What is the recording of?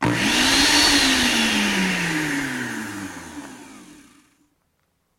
Vacuum start and stop
buzz, latch, machine, mechanical, whir